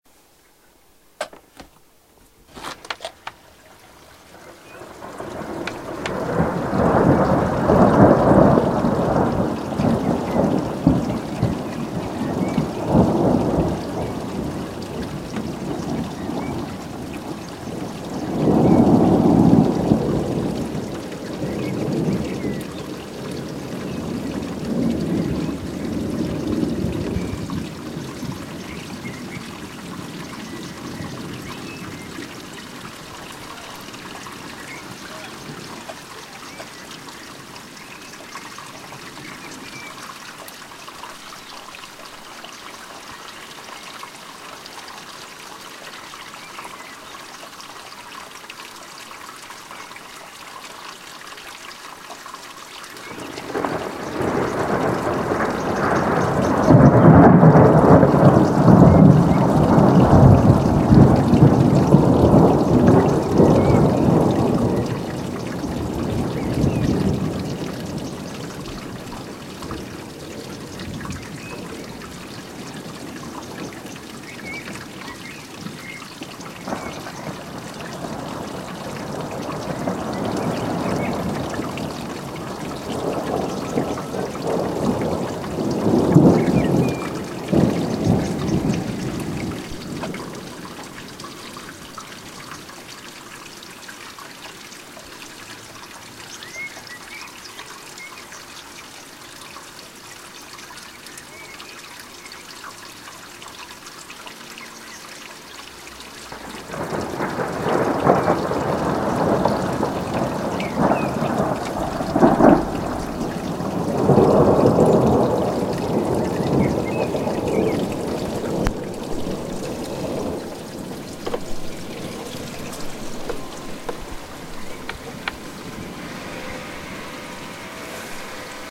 donder water
My first!!
Just some thunder with flowing water in the background and a bird doing some 'singing' ( I believe it's a blackbird).
bird, flowing-water